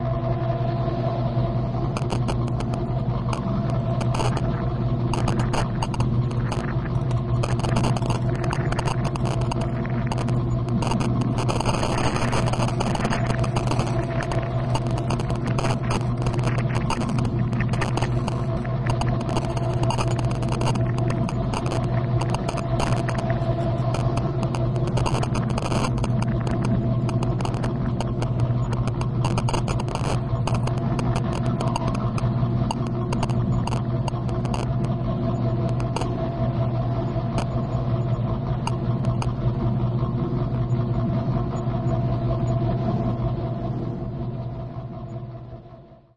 Creative Sounddesigns and Soundscapes made of my own Samples.
Sounds were manipulated and combined in very different ways.
Enjoy :)
Bitcrush, Clicks, Drone, FX, Noise, Random, Scifi, Soundeffect, Soundscape, Static